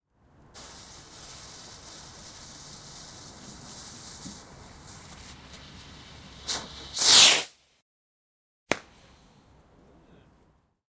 Firework - Ignite fuze - Take off - Small pop 3
Recordings of some crap fireworks.
rocket, Bang, Fizz, fuze, whoosh, Firework, pop, ignite, Boom